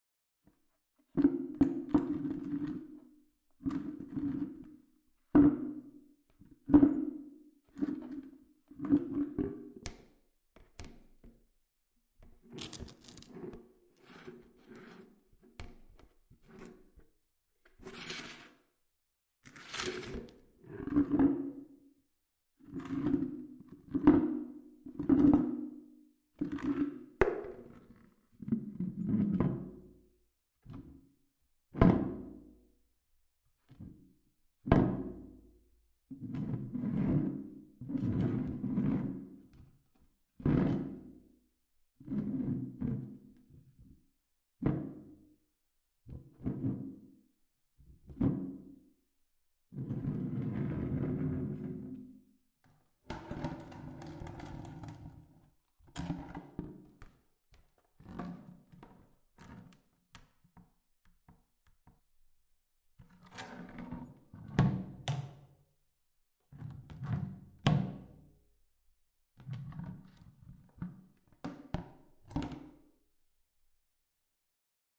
20190102 Moving a Bucket around the Toilet 1
bucket, cylinder, plastic